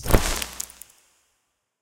A simple handy open/close inventory sound to be used in fantasy games. Useful for opening your inventory, or for selecting/searching a backpack.